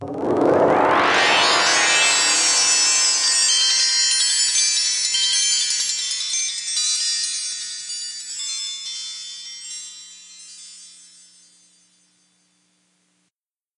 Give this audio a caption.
Fantasy SFX 004
a box opening or a wand passing or...
fairies, fantasy, portal, sparks, transporter